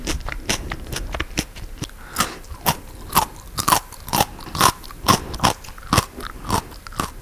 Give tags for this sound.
a crunchy cucumber eating food munching vegetable vegetables vgetables

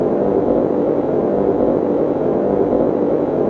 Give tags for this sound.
ambient drone effect industrial noise

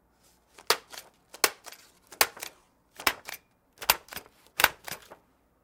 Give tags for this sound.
intermediate sound class

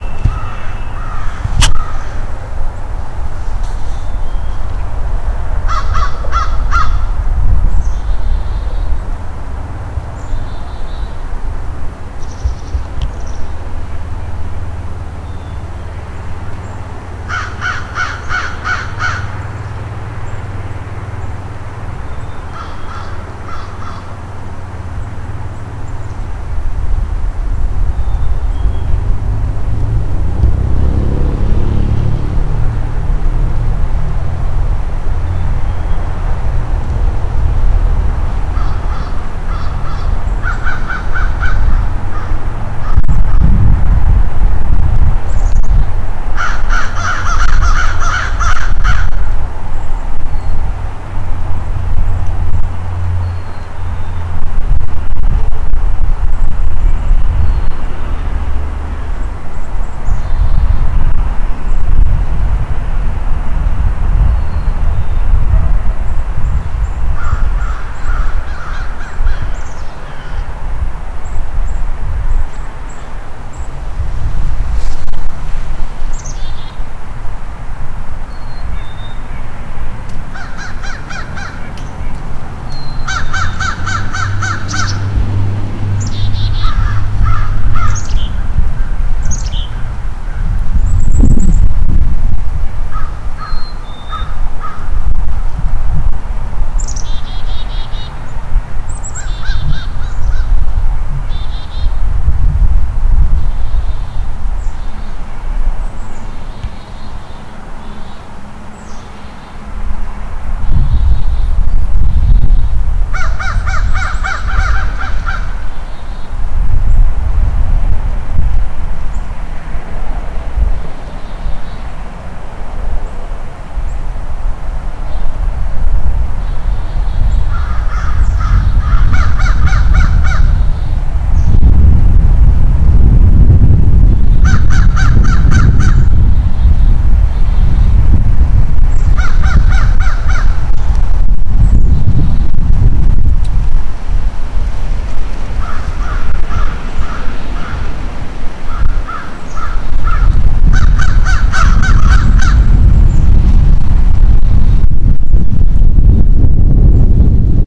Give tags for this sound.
calling crow field-recording

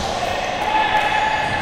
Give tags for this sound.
basketball shout sport TheSoundMakers UPF-CS13

